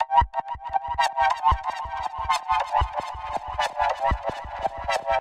Main Notes are Played in B maj. It sounds like a drama of synthesis. I use this for a track called freak out. Processed and created with Absynth 5.
electronic, major, Uptempo